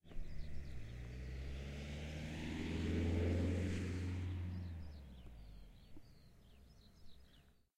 passing bye in the distance
Sample recorded with ZOOM H4 in Checiny in Poland. External mics have been placed on the level of the wheels in 7,5m distance from the road.